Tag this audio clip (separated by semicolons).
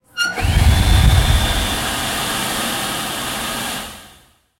UEM; Universidad-Europea-de-Madrid; paisaje-sonoro; soundscape